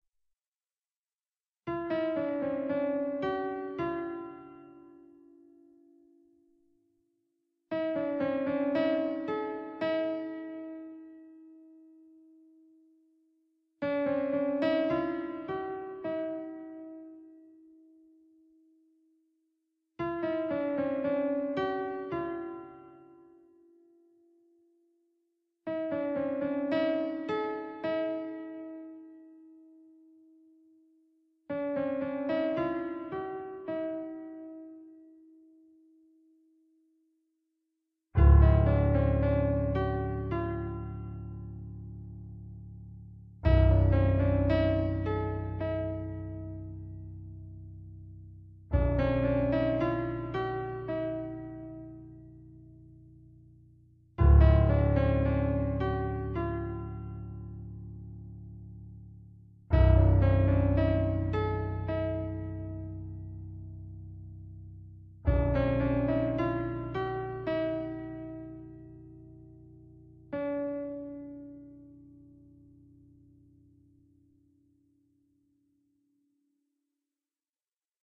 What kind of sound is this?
Piano music created for various purposes. Created with a syntheziser and recorded with MagiX studio.